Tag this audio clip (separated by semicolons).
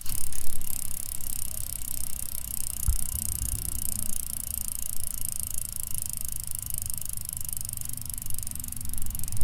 bicycle; ratchet; wheel